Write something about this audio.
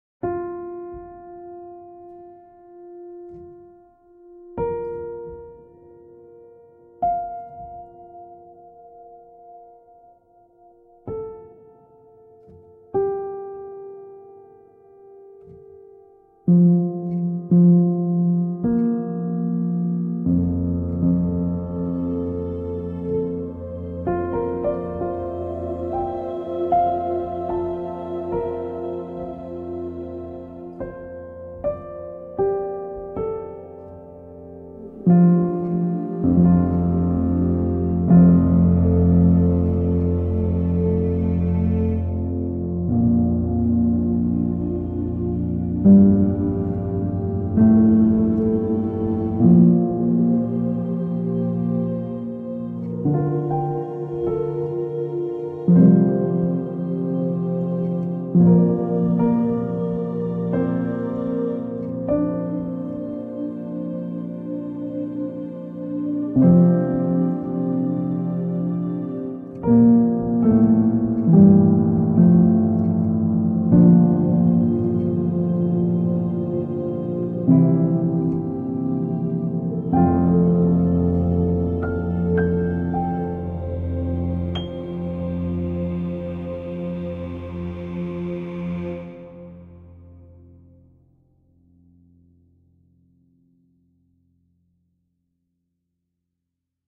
BCO - Daylight rising
Piano and choir in an ethereal harmony.